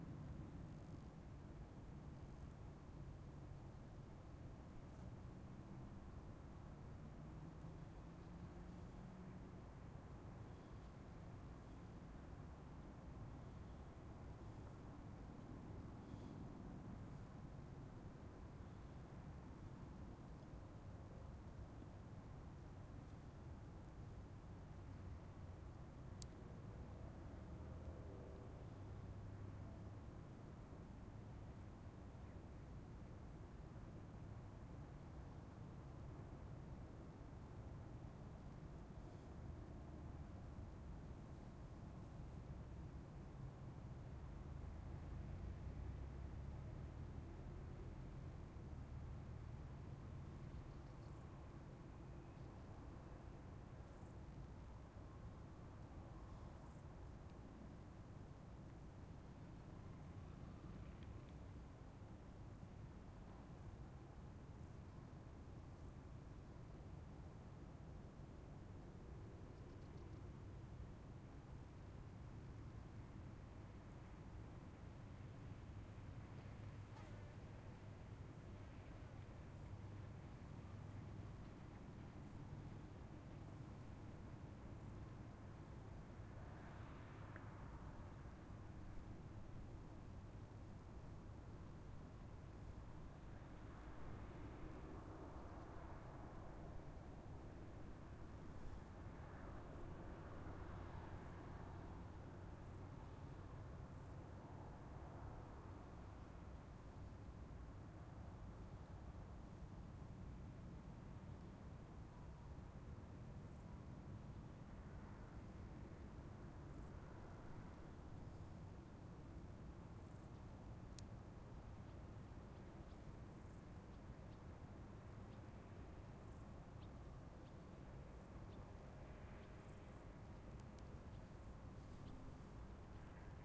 Ambience Mountain Outdoor Forat del Vent
Mountain Ambience Recording at Forat del Vent, August 2019. Using a Zoom H-1 Recorder.
ForatdelVent, Mountain